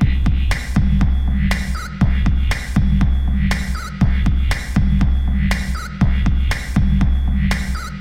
Freeze Battery 445B
ableton, battery, drums, loop